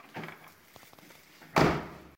sonido abrir y cerrar puerta de carro grabado en parqueadero